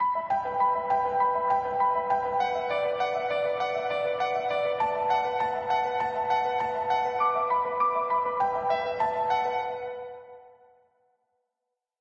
piano snippet after lovely sunny day bu the fountain